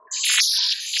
Created with coagula from original and manipulated bmp files. Classic retro scifi computer noise.
compute, computer, image, processing, space, synth